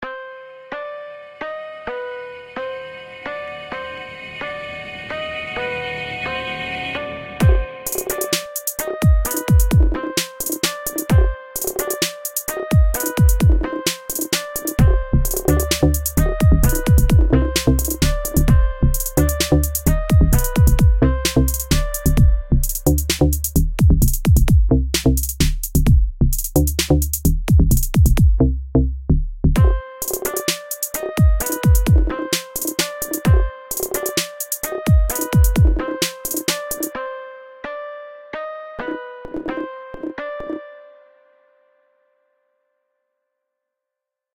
Trap130bpm
A little something I mixed together in FL Studio 12, made for fun and shared for fun too!
(Made 17th December 2015 02:47am)
If you don't..